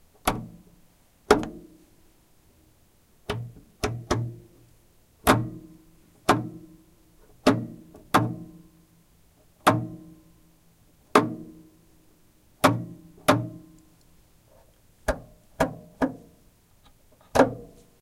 Recorded with my Sony MZ-N707 MD and Sony ECM-MS907 Mic. While I was teaching in public school, I came across some old science class equipment that had very nice knobs and switches that flipped and clicked rather nicely. This recording is me switches some of these nice sounding clicks.